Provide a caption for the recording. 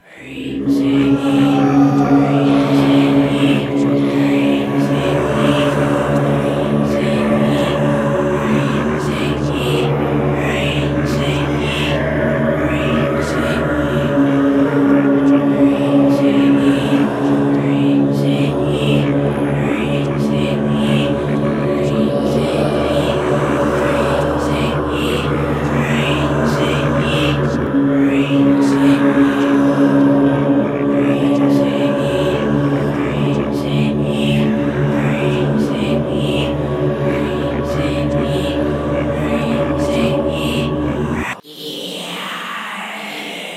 Evil mouth ensemble
this is a sample i made using just my voice. everything in this came from my mouth.
dark, demon, ensemble, evil, mouth, satan, spirits